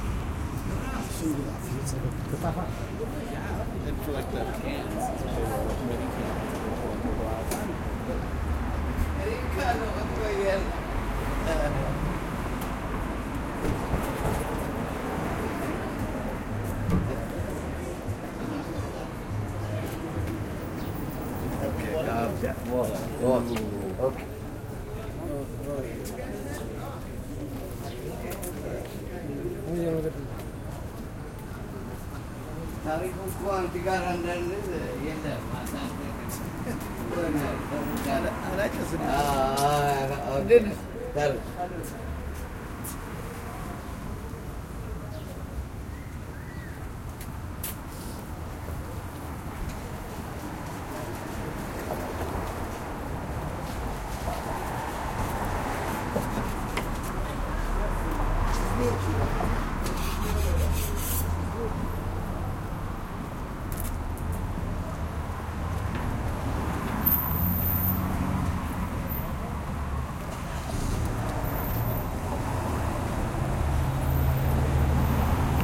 Urban street sounds Bloor St W Toronto 29 May 2011
A field recording made as I walked on the North side of Bloor St W, near Shaw Street in Toronto on the evening of 29 May 2011. This is a commercial strip with many Ethiopian bar-restaurants, outside of which men gather to smoke and have conversation. You can hear some of these as we pass along Bloor, as well as the conversations of other passers-by. These sounds are pretty typical of this section of Bloor on a fine spring evening. Recorded with a Roland R05 and accessory stereo directional microphone.
city-streets, field-recording, sidewalk-conversations, street-sounds, toronto, traffic-noise